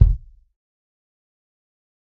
Dirty Tony's Kick Drum Mx 030
This is the Dirty Tony's Kick Drum. He recorded it at Johnny's studio, the only studio with a hole in the wall!
It has been recorded with four mics, and this is the mix of all!
tony, punk, realistic, kit, raw, tonys, kick, pack, drum, dirty